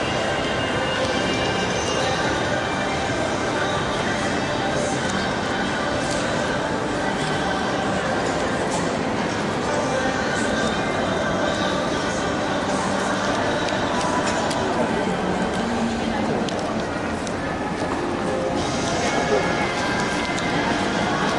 Noise recorded at Manufaktura- Shopping Mall in Łódź, Poland
It's not reminded by any law, but please, make me that satisfaction ;)